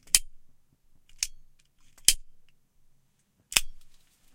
noise of a cigarette lighter, recorded using Audiotechnica BP4025, Shure FP24 preamp, PCM-M10 recorder
flame, smoking, tobacco, collection, gas, zippo, disposable, clipper, cigarette, lighter